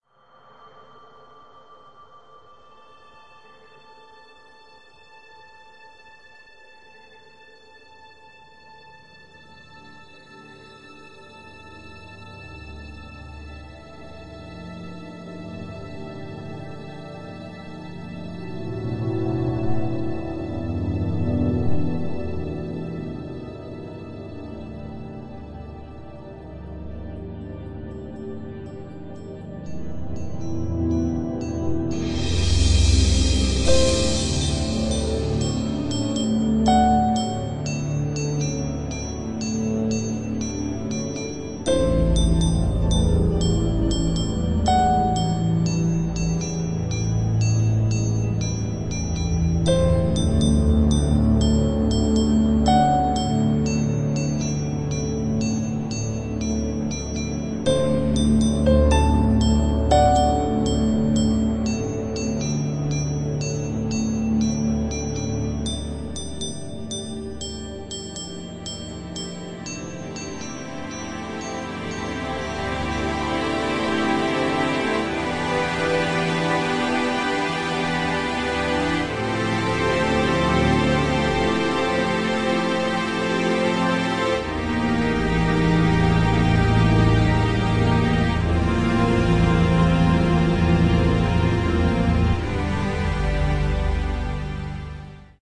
Ethereal and mysterious feeling song that turns more classical and beautiful.